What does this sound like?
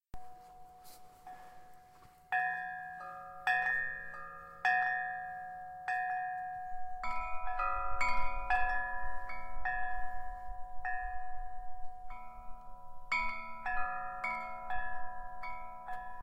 Handcrafted bells make beautiful sounds.
ambient, bell, ring